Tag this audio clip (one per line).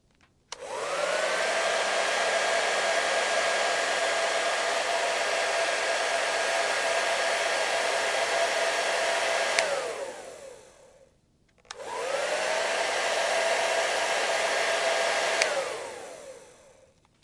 dryer
drying
hair
hairdryer